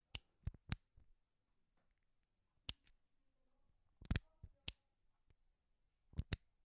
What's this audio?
Lock (Various)
A small lock was locked and then unlocked in various ways and was recorded with a Zoom H6, using the XY Capsule.
Click, Key, Keylock, Lock